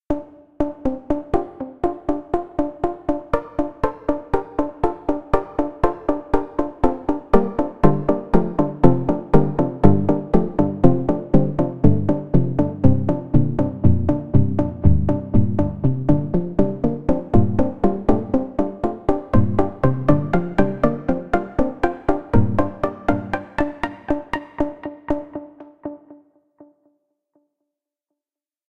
plucked harp
harp, stringed, plucked-harp, electronic